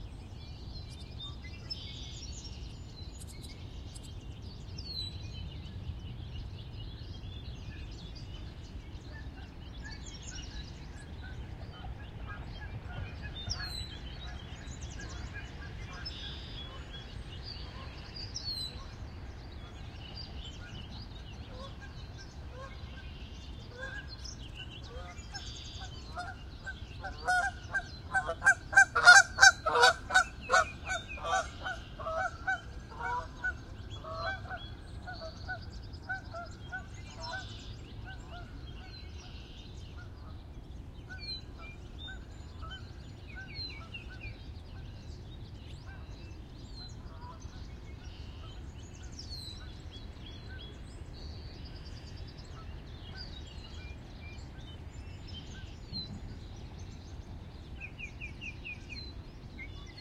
Geese Flyby
Some migrating geese fly directly overhead, going from the left to the right side of the stereo field. You can hear the doppler shift as they pass. Songbirds sing in the background. Traffic can be heard. Recorded during the 2019 World Series of Birding.
2 EM172 Mic Capsules -> Zoom H1 Handy Recorder -> Noise Reduction (Ocen Audio)
field-recording, nature, geese, goose, park, EM172